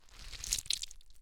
rubber anti stress ball being squished
recorded with Rode NT1a and Sound Devices MixPre6
blood, brain, flesh, foley, goo, gore, gross, horror, horror-effects, mush, slime, splat, squelch, squish, wet